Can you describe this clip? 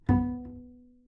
Double Bass - B3 - pizzicato
Part of the Good-sounds dataset of monophonic instrumental sounds.
instrument::double bass
note::B
octave::3
midi note::59
good-sounds-id::8743
pizzicato, single-note, good-sounds, multisample, neumann-U87, B3, double-bass